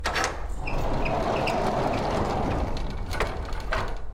Horse Stall Door Close
This is a recording of a horse stall door closing.
Door, Horse, Stall, Close